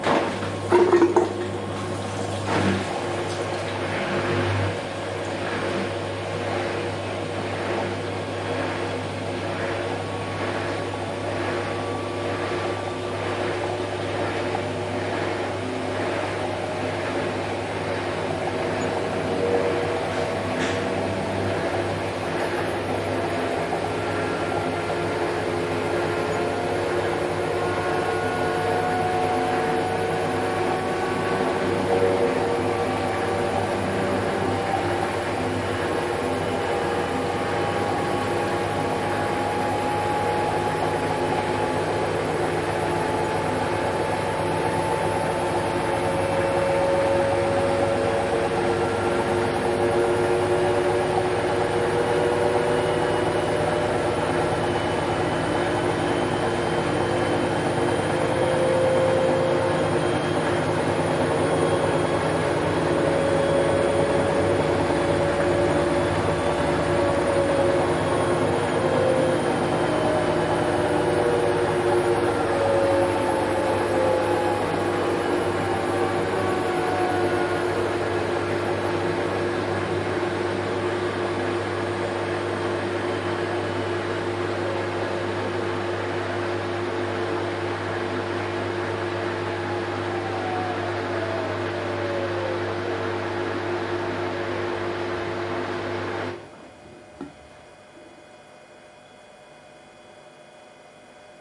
Washing machine 9 spin
Various sections of washing machine cycle.